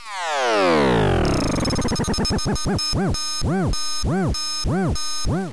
descent with buzzes per bounce
8-bit, arcade, buzz, buzzes, buzzing, chippy, decimated, game, lo-fi, machine, noise, robot, video-game